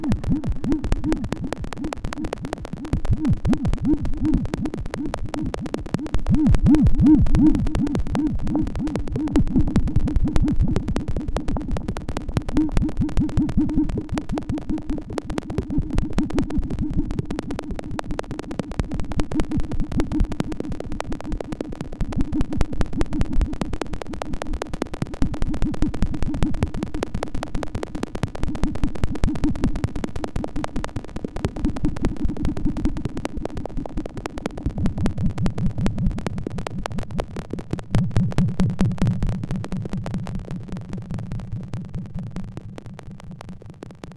synthetic, cricket-like sounds/atmo made with my reaktor-ensemble "RmCricket"
clicks, atmosphere, glitch, cricket, synthetic, noise